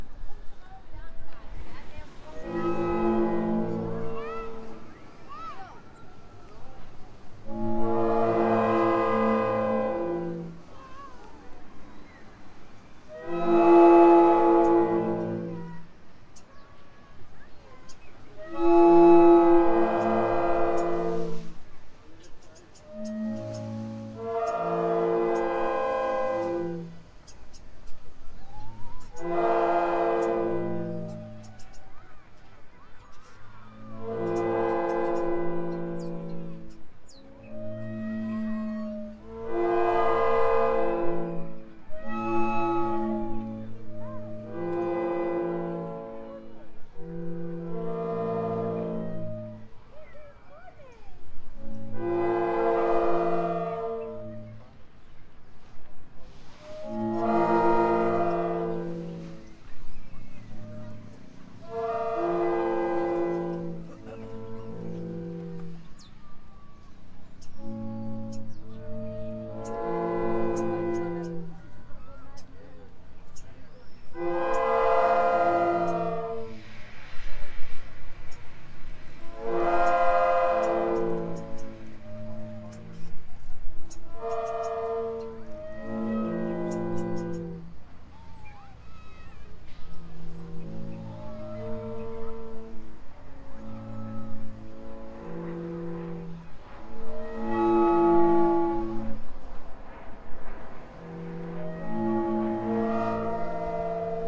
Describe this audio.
Blackpool High Tide Organ
Blackpool, England, Tide-Organ, UK, United-Kingdom, Blackpool-High-Tide-Organ, Liam-Curtin